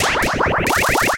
As all files in this sound pack it is made digitally, so the source material was not a recorded real sound but synthesized sequence tweaked with effects like bitcrushing, pitch shifting, reverb and a lot more. You can easily loop/ duplicate them in a row in your preferred audio-editor or DAW if you think they are too short for your use.